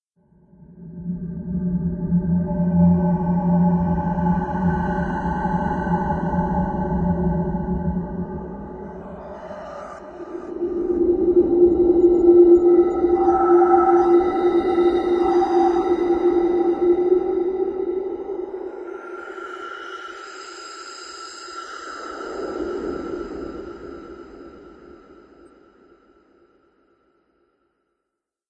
floating through an unknown part of outer space